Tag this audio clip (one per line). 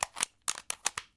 gameboy-advance
weapon
reload
hangun
clip
gun
magazine